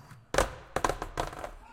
Skateboard Drop.1
art, samples, alive, recording